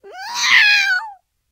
Angry Cat Meow
Pitch bent human voice. Supposed to be the sound a cat makes when being trampled.